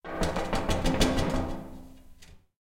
Glass Windows Knocking 2
In a basement I recorded noises made with a big glass plate. Rattling, shaking, scraping on the floor, etc. Recorded in stereo with Rode NT4 in Zoom H4 Handy Recorder.
plate, room, knocking, window, glass